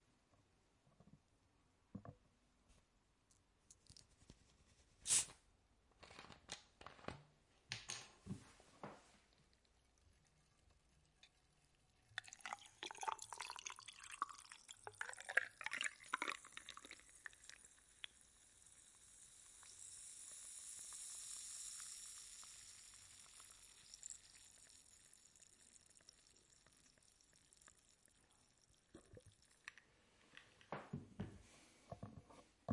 Fizzy drink pour with ice
alcohol, beer, beverage, bottle, bubbles, clink, cup, drink, fill, fizz, fizzy, glass, h6, ice, liquid, pop, pour, pouring, soda, spirits, water, wine